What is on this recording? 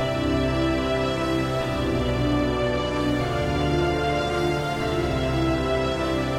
BarlEY Strings 2
New Orchestra and pad time, theme "Old Time Radio Shows"
ambient, background, oldskool, orchestra, pad, radio, scary, silence, soudscape, strings